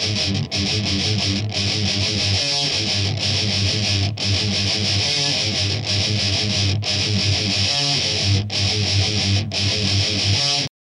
THESE ARE STEREO LOOPS THEY COME IN TWO AND THREE PARTS A B C SO LISTEN TO THEM TOGETHER AND YOU MAKE THE CHOICE WEATHER YOU WANT TO USE THEM OR NOT PEACE OUT THE REV.
rythum, hardcore, heavy, rythem, metal, groove, guitar, rock, thrash, loops